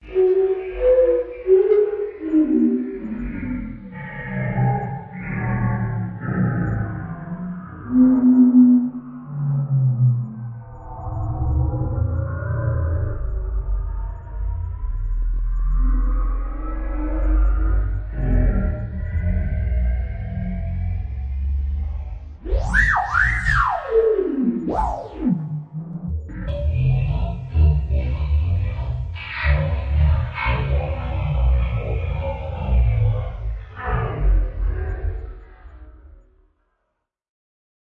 tipo star wars
Sounds like Starwars beasts. Sonido similar a bestias de starwars.
beast, fiction, sound, star, star-wars, wars